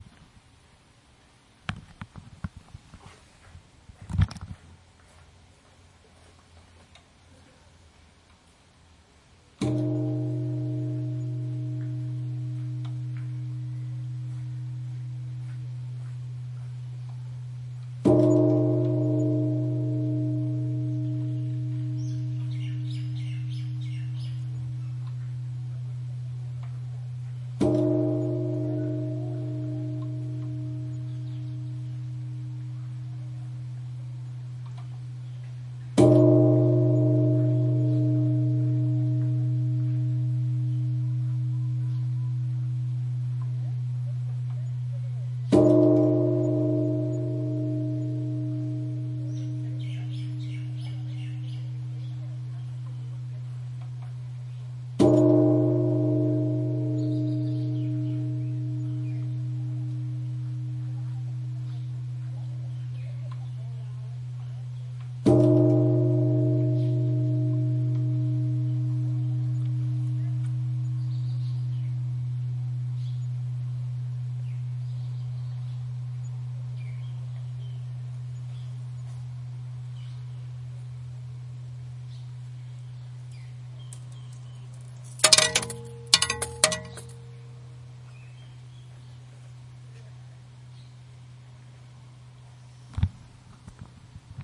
A better recording of the Temple bell at the Valley of the Temples on the Windward Side of Oahu, Hawaii. Recorded with a Zoom H2n Handy Recorder. This is the custom in Japan and elsewhere.